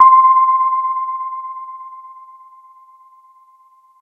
This sample is part of the "K5005 multisample 05 EP
1" sample pack. It is a multisample to import into your favorite
sampler. It is an electric piano like sound with a short decay time an
a little vibrato. In the sample pack there are 16 samples evenly spread
across 5 octaves (C1 till C6). The note in the sample name (C, E or G#)
does indicate the pitch of the sound. The sound was created with the
K5005 ensemble from the user library of Reaktor. After that normalizing and fades were applied within Cubase SX.
electric-piano, multisample, reaktor